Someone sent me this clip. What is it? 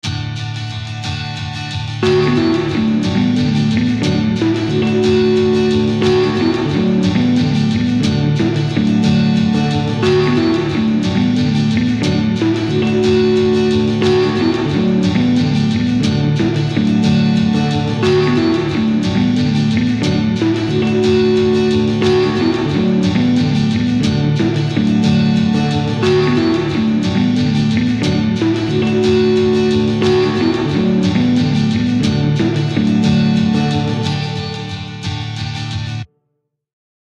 cool music in garage band ....... i am runing out of ideas ..... bye